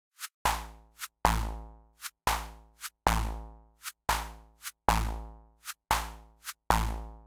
132 BPM element for making yer own head-bopping tune.
electronic, funky, rhythmic, techno